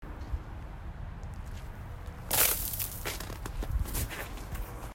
Kick snow leaves
winter, nature, field-recording